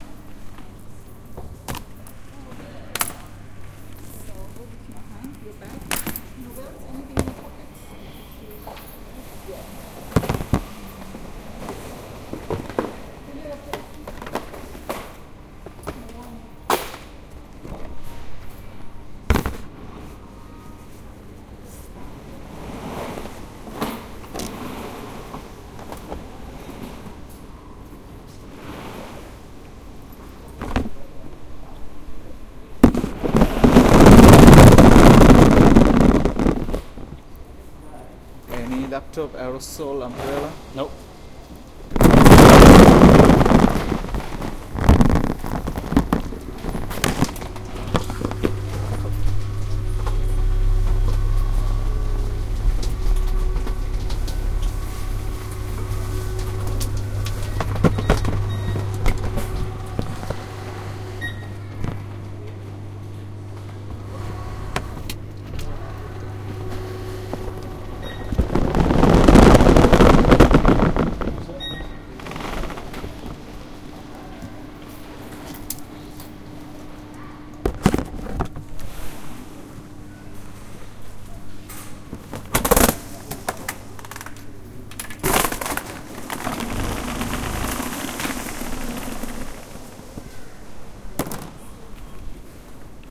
Security screening at Perth Airport
The final step before you make it into the duty free section. This is a recording of my hand luggage being screened at Perth Airport in December, 2019. The recording was done using a Tascam DR-05X.